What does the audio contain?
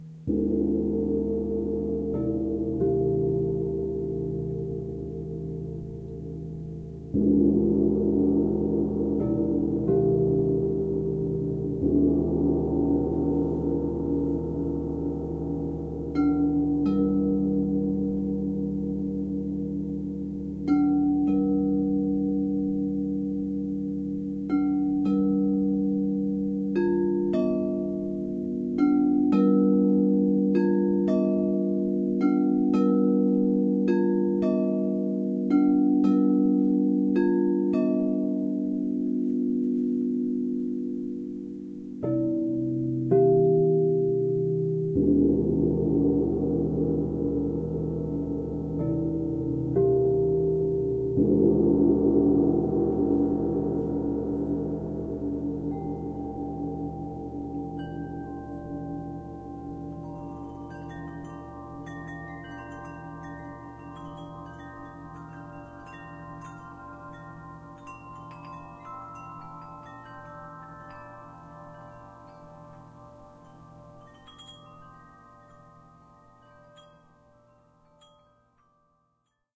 Crystal Bowls and Gong, short track
Set of crystal singing bowls mixing variance of notes with 30" diameter Zildjian gong. Recorded with Zoom H4N placed 6 feet at front center 1 foot from floor, bowls and gong set up in a semi-circle on floor.
ambient-music, relaxation, healing-music, meditation-music, gong, crystal-singing-bowls